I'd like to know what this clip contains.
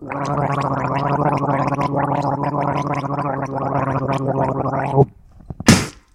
Gargle Then Spit
Couldnt find a gargling sound that fit a more comedic vibe, so i made one myself. Hope others can find it useful. used a microphone and water, then spat in a sink.
Then, water, sounds, sound, bathroom, spit, gargle